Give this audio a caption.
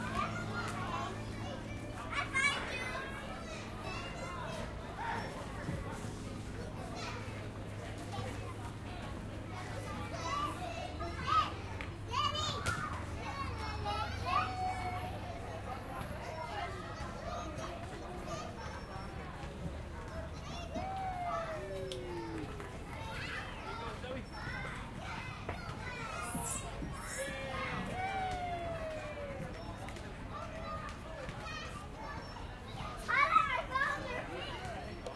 Field recording of kids playing at a park during the day.